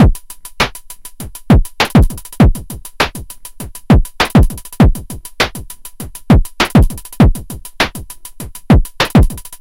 Drums loop Massive Groove 100BPM
100bpm, loop, drums